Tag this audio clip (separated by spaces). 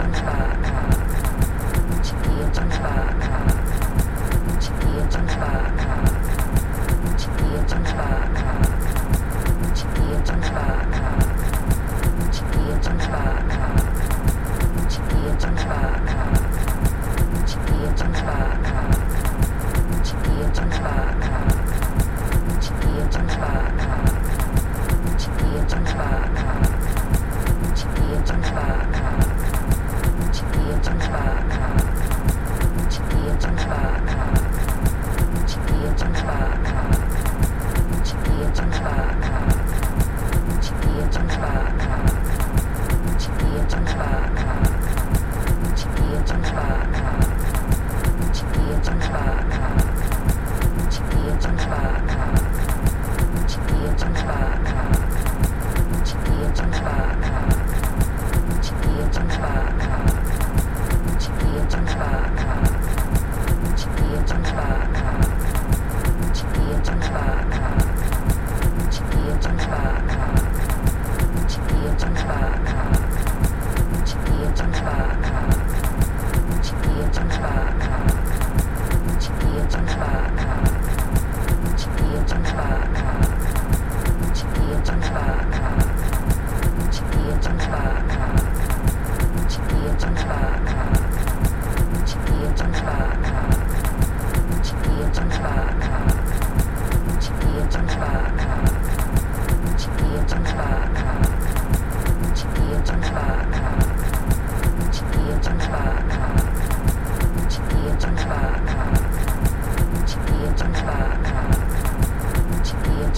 drums rattle chants loop